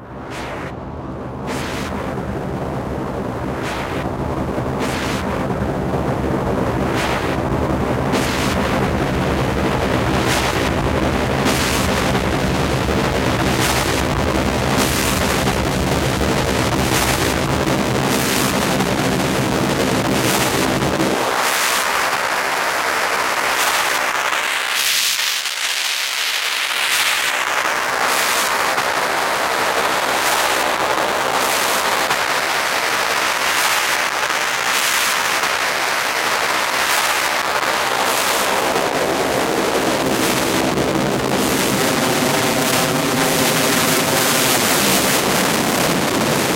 Analog Sandstorm was made with a Triton, and 2 Electrix effect processors, the MoFX and the Filter Factory. Recorded in Live, through UAD plugins, the Fairchild emulator,the 88RS Channel Strip, and the 1073 EQ. I then edited up the results and layed these in Kontakt to run into Gating FX.
Analog-Filter, Distortion, Noise